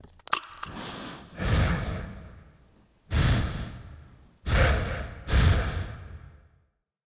One of the "Bull" sounds I used in one play in my theatre.
bull, monster